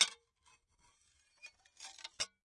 Small glass plates being scraped against each other. Smooth scraping sound, articulate at end. Close miked with Rode NT-5s in X-Y configuration. Trimmed, DC removed, and normalized to -6 dB.